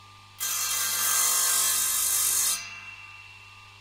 circ saw-06
Distant circular saw sound.